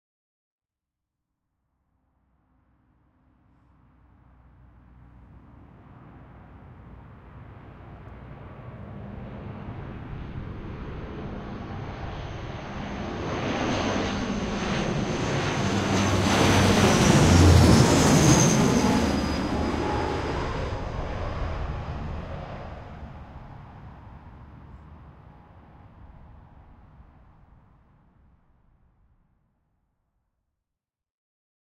Stereo Propliner Landing Sample 1
Third test sample using 2 NTG3's for seperate left and right audio channels. Recorded using Fostex FR2-LE, Mogami Neglex Quad XLR Cables.
Aircraft were about 10-20ft about microphones.